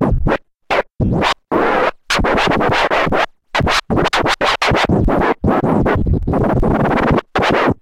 These samples made with AnalogX Scratch freeware.
scratch, synthetic, vinyl